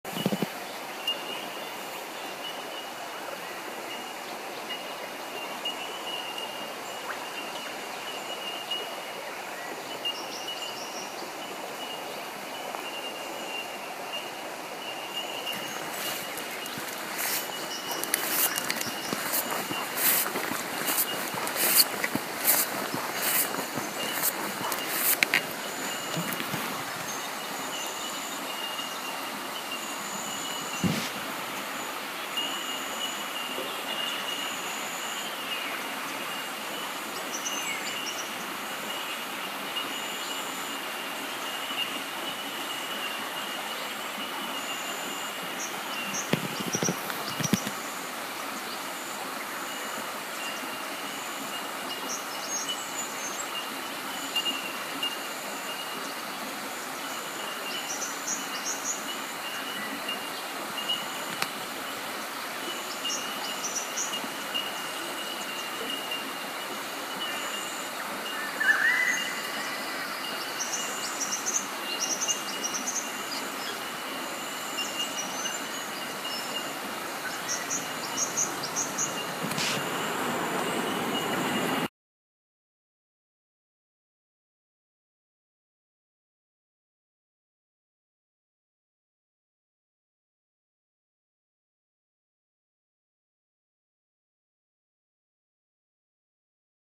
Thunderbolts-River
Bell birds by a bubbling brook and some squeaky bummbling by the sound recordist.
Birds, river